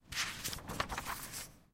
book pages
Sound of pages beeing looked slowly in library.
Recorded at the comunication campus of the UPF, Barcelona, Spain; in library's second floor, shelves in front of group work room.